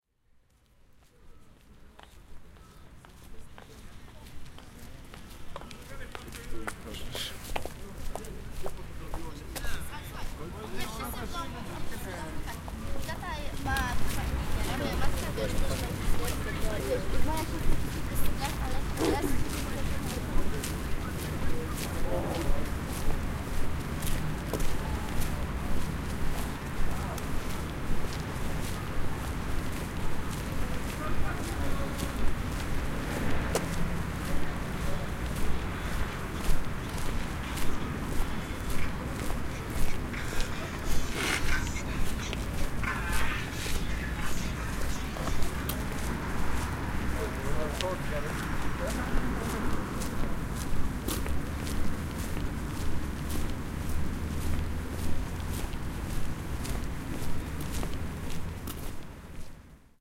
night park 030411
03.04.2011: about 01.00 at night. Drweckich City Park in Wilda district in Poznan. partying teenagers in the park. in the background usual traffic sound.
city-park, people, teenagers, music, drone, street, night, voices, park, cars